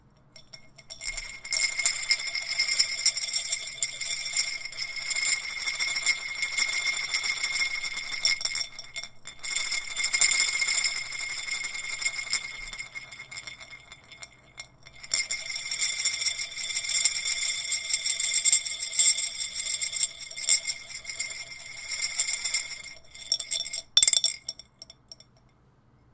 A glass marble spun in a small clay pot.